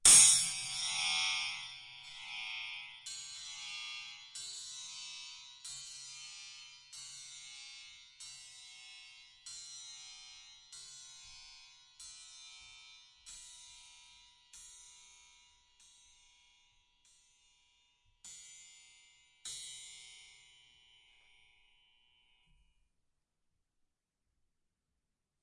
A metal spring hit with a metal rod, recorded in xy with rode nt-5s on Marantz 661. Swinging around, tips off a prayer bell